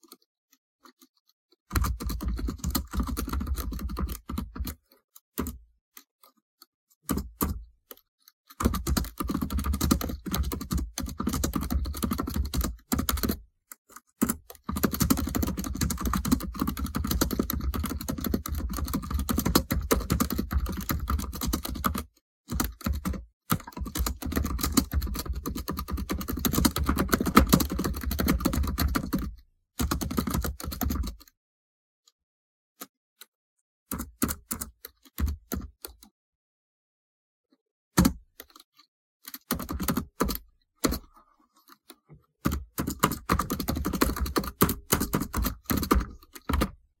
Typing (HP laptop)
Me typing on the keyboard of this very laptop from which I am uploading this sound. Recorded with HQ Recorder for the iPhone.
typing, keys, office, computer, tech, messaging, key, text, keyboard, pc, Lenovo, technology, message